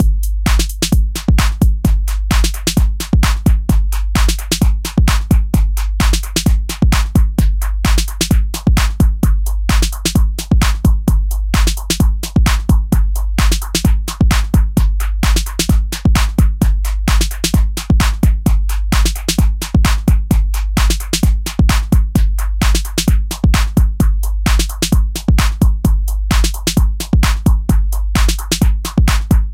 130bpm 808 breakloop LFO
Standard 808 kit with individual compression. LFO effects applied to the claps so the echoes move around the EQ frequencies. Loops perfectly at 130bpm, hope you enjoy.
130-bpm, 808, bass, beat, break, breakbeat, clun, dance, drum, drums, hard, lfo, loop, looper, percussion-loop, sequence, sub, techno, trance